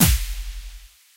generated a series of percussive hits with xoxo's free physical modeling vst's
and layered them in audacity